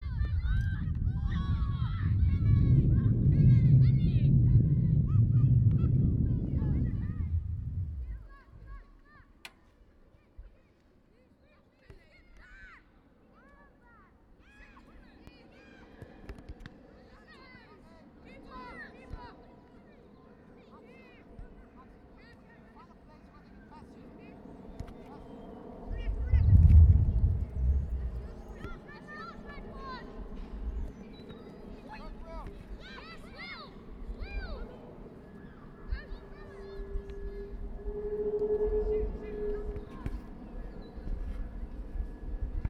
Kids playing football in London Fields